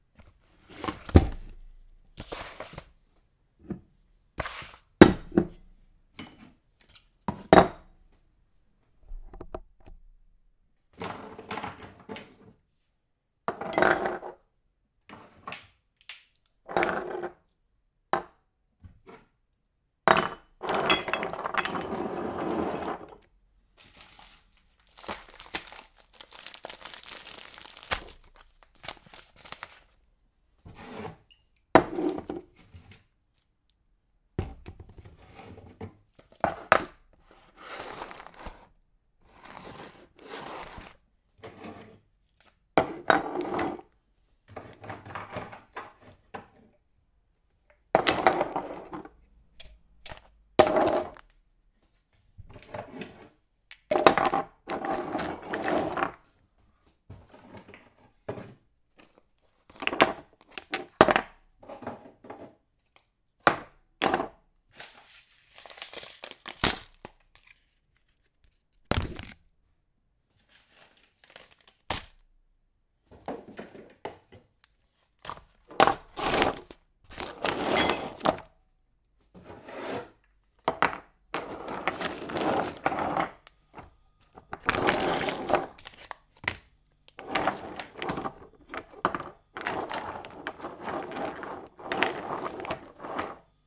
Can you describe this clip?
Organising my food cupboard. Placing jars and food packets on the kitchen counter